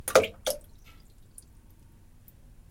Single water splash. Drop bath stub into the bath.
bath, splash, water